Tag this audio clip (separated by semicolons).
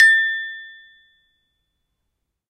gamelan; hit; metal; metallic; metallophone; percussion; percussive